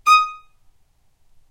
violin spiccato D#5
spiccato, violin